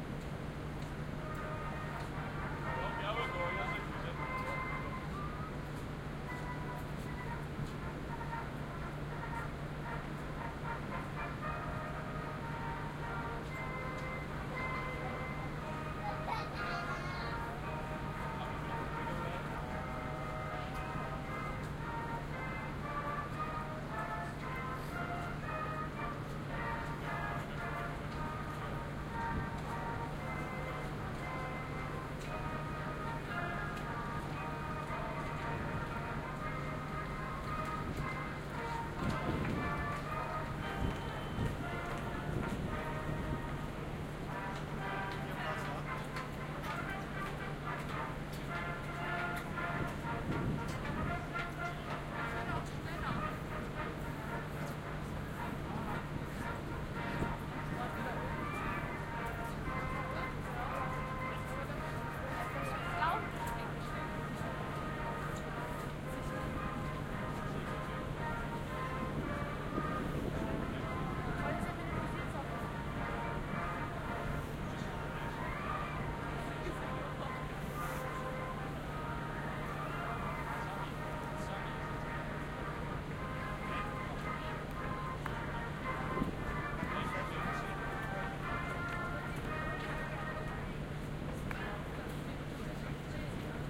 This track was recordered with my Sharp MD-DR 470H minidisk player and the Soundman OKM
II binaural microphones on deck the Princess of Norway, on a
ferrycrossing in the Northsea. They always play this kind of music and
everyone, who is strolling along the deck, then seems to be marching to
it. Strange...but true.